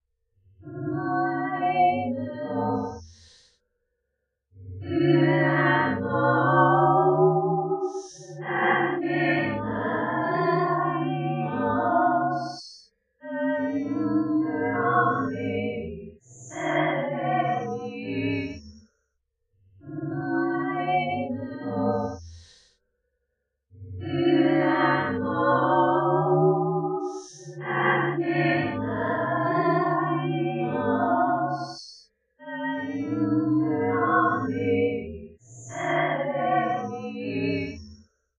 A heavily processed and looped sample from the spectrum synth room in Metasynth. There is resonance on the lows and it is slowed down, but you can still tell it is made from a female voice. The original input was myself singing part of the song Strangest Thing originally sung by Clare MAguire.
voice, voice-sample, metasynth, spectrum-synthesizer, hiss, processed-voice, female-voice, robotesque